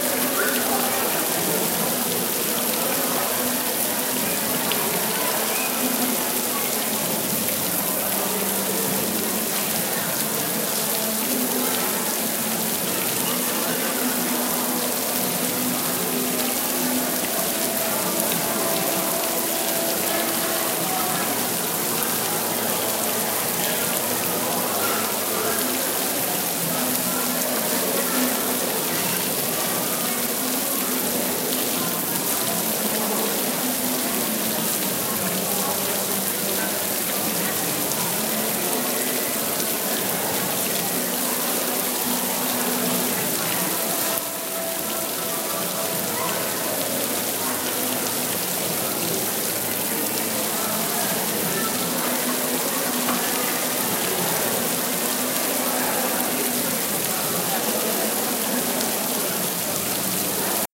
Recorded the fountain at Kahala Mall. Recorded with an iPhone with Voice Memos. Some mall ambience can be heard as well.
kahala, mall, water, ambience, fountain